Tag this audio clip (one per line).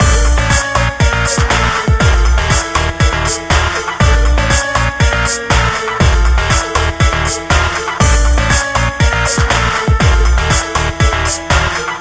battle
gamedeveloping
games
gaming
indiegamedev
music
music-loop
victory
videogames
war